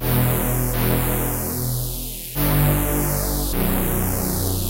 biggish saw synth e e g b 102 bpm-03
biggish saw synth d a b e 198 bpm
acid, bass, club, dance, dub-step, electro, electronic, house, loop, rave, saw, synth, techno, trance, wave